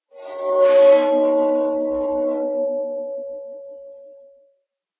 cymbal resonance
cymbal processed sound